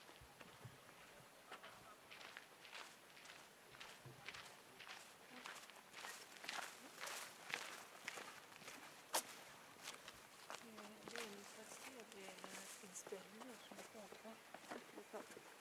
FX - pasos sobre gravilla